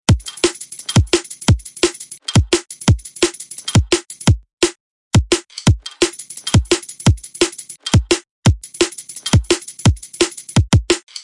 dnb roller 172bpm
i made this beat in ableton